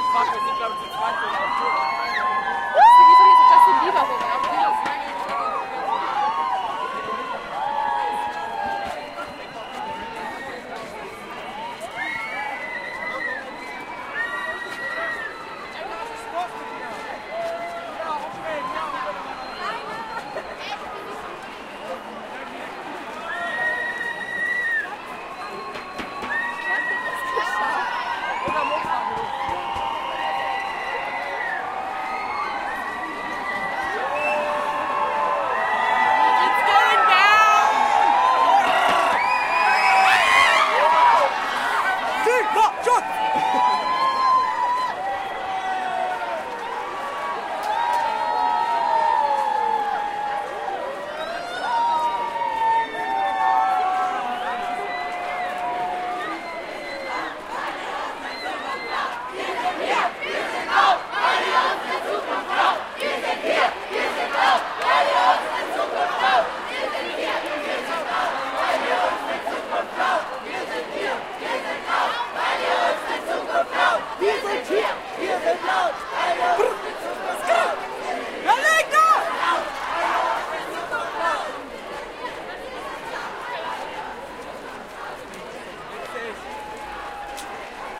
FridaysForFutureAugsburg18Jan2019
Ambience recording of a crowd of 1,500 students and adults marching, cheering and chanting during a FridaysForFuture rally in Augsburg (Germany) on 18 Jan 2019.
starting at 0:50: chants of the German FridaysForFuture slogan: "Wir sind hier, wir sind laut, weil ihr uns die Zukunft klaut!" (We are here, we are loud, because you're stealing our future!)
Recording device: Zoom H4n
Pre processing: soft limiter
Post processing: normalized to 0.0 dB
ambience, applaud, applause, chanting, cheering, clap, people